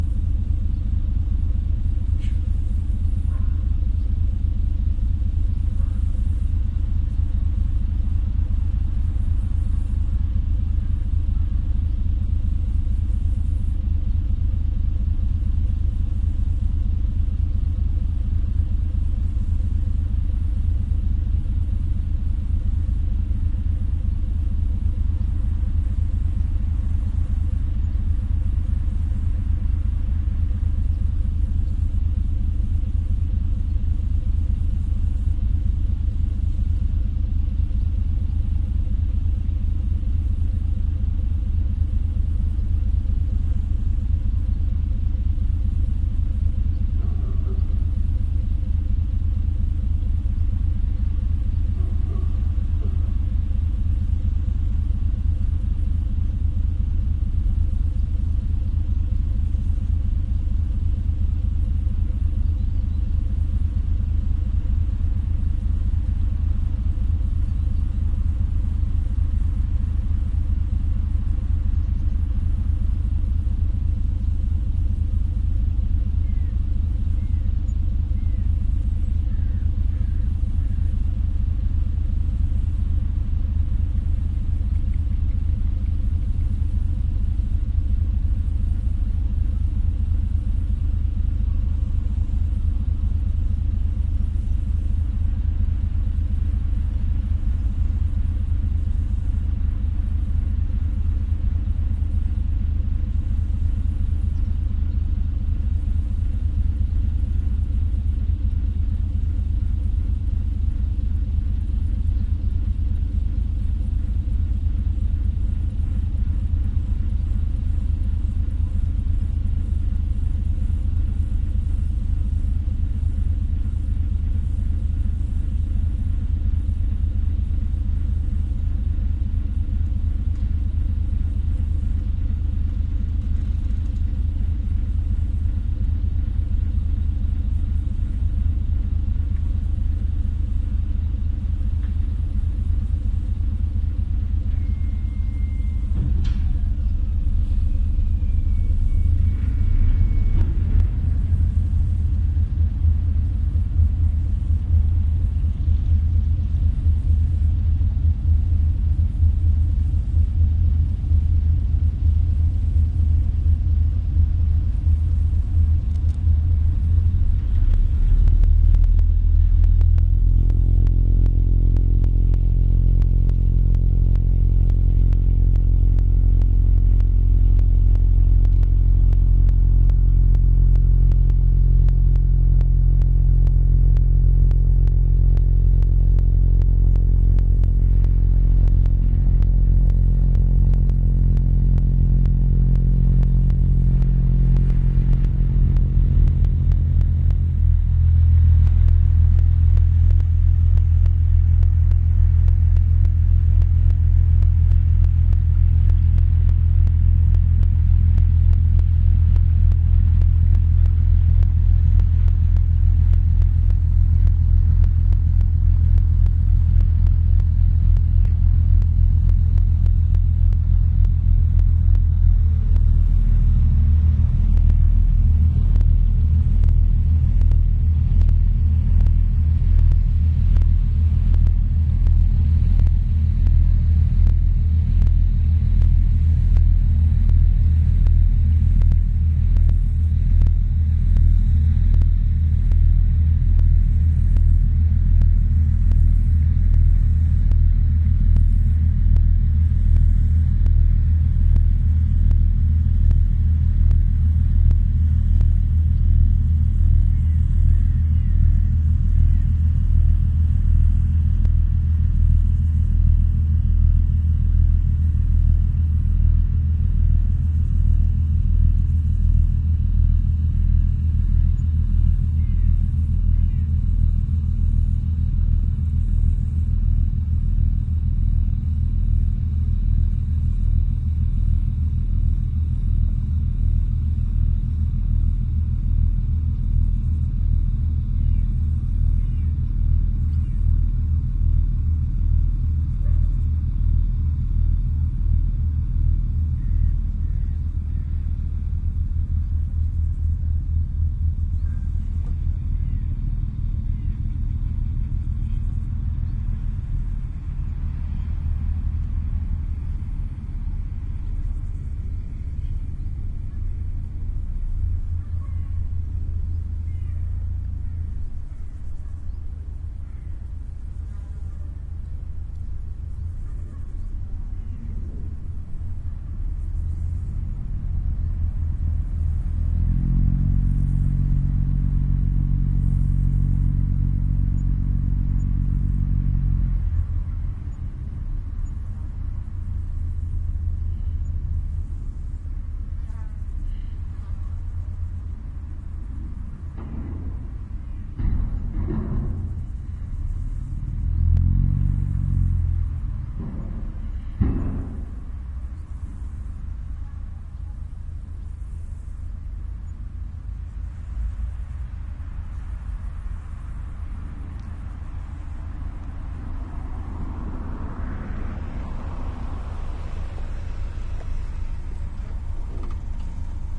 Ferry over the river Elbe
The villages Ferchland and Grieben are connected by a small carferry. The ferry crosses the river Elbe in 4 minutes and does so every 13 minutes.The WL187 microphones were placed on the eastern shore of the river, a FEL preamp and the R-09HR recorder were used to record this track.
boat, elbe, ferry, field-recording, river